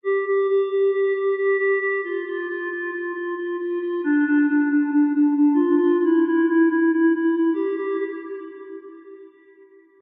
FORF Main Theme Obój 01
cinematic, epic, orchestral, soundtrack, trailer